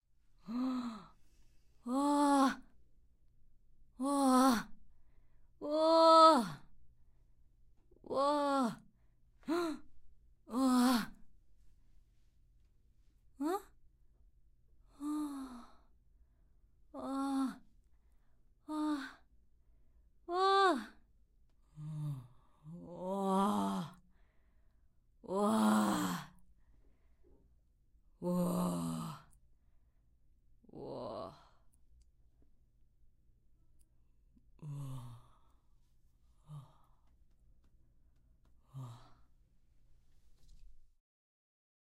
Murmurs Of Astonishment Crowd